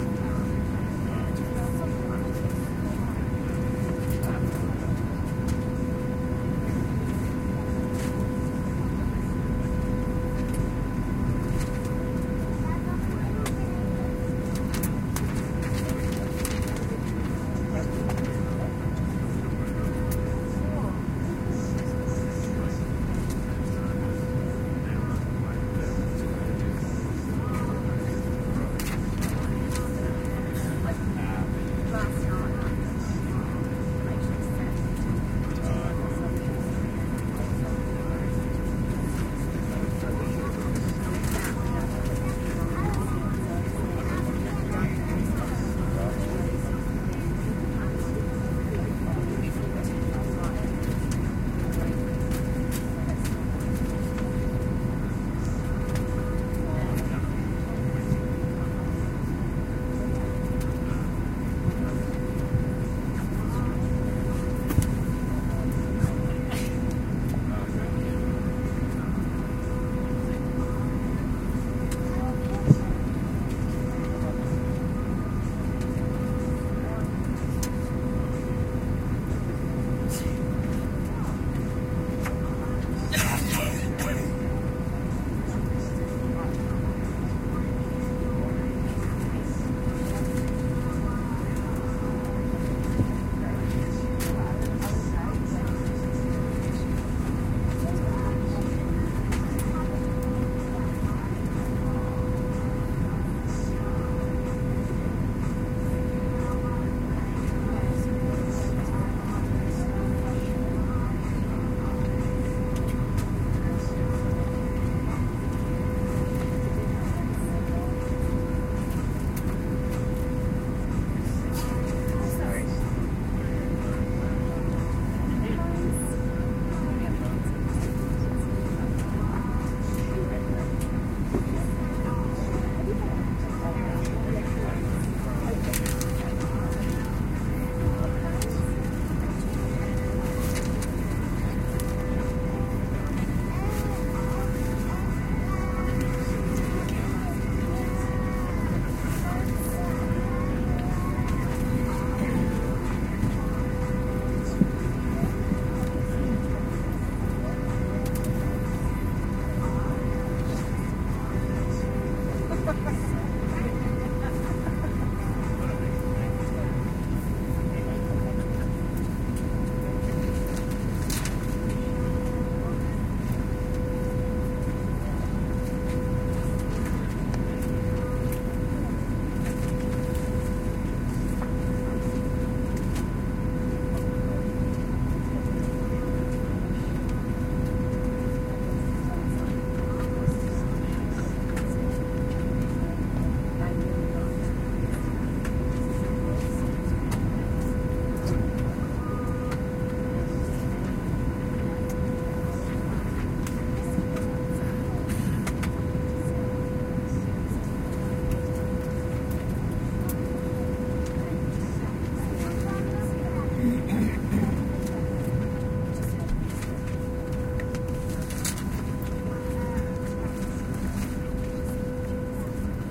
Qantas City Flyer 670 - Preflight Idle
The sounds in the cabin after being pushed back from the gate. This is prior to the main engines starting, the 'engine-like' noise you can hear is the small Auxiliary power unit in the tail of the plane,(a small jet) that runs the generators and aircon before the main engines are going.